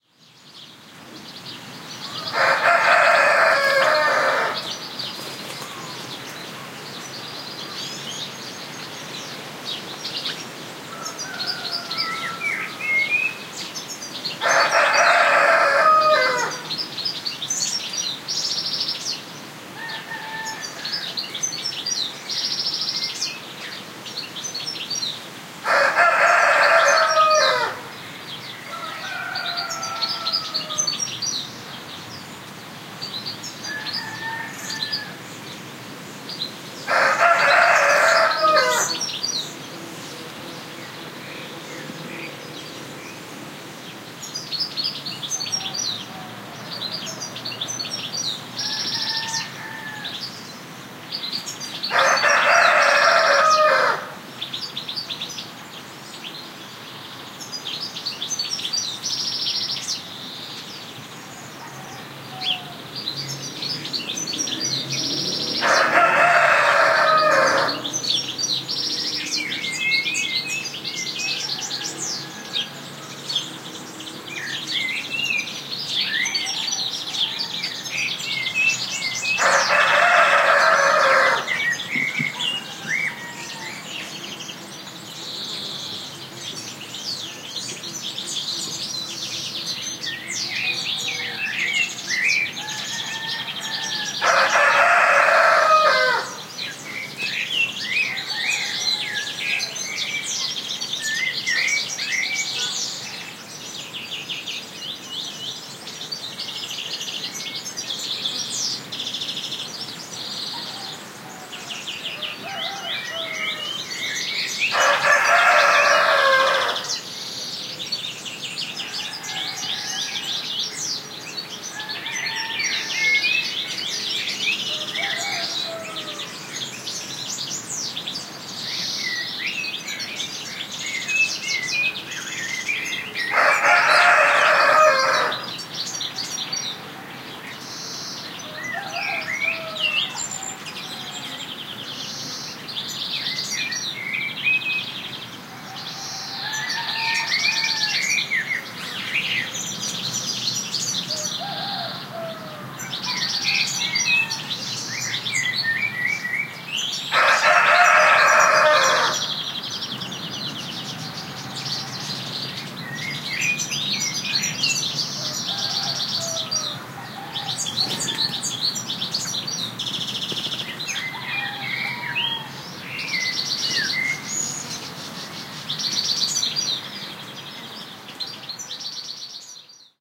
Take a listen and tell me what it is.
Hot levels, mind your speakers/headphones: rooster repeatedly doing cock-a-doodle-doo at some distance, with bird chirps and tweets in background (Serin, Blackbird, Pigeon) along with some passing car. Low-cut filtered below 120 Hz. Recorded using Sennheiser MKH60 + MKH30 into Shure FP24 preamp and Tascam DR-60D MkII -sensitivity set to 'Mid' (overkill, I know, but I was testing). Decoded to mid-side stereo with free Voxengo plugin. Recorded near Olivares, Sevilla Province (Spain)
nature, rooster, south-spain, spring, rural, ambiance, field-recording, birds, cock-a-doodle-doo